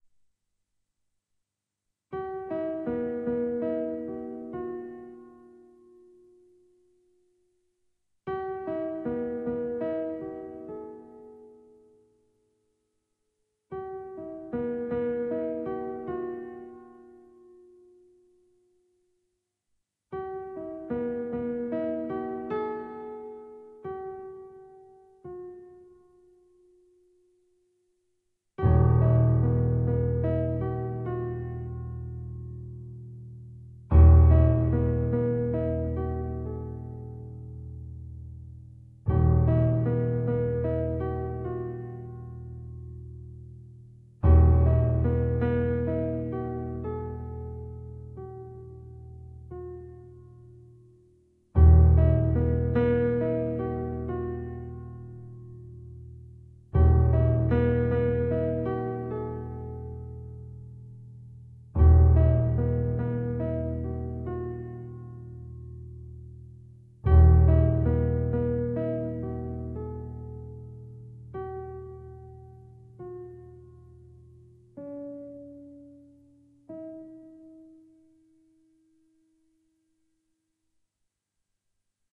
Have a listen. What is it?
mysterious piano
Piano music created for various purposes. Created with a syntheziser and recorded with MagiX studio.